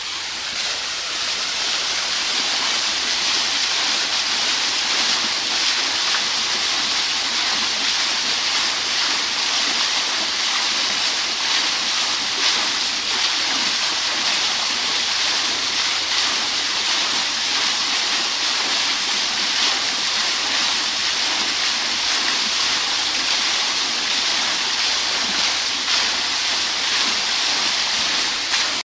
washington artgallery fountain
The eastern fountain in front of the National Art Gallery on the National Mall in Washington DC recorded with DS-40 and edited in Wavosaur.
fountain, summer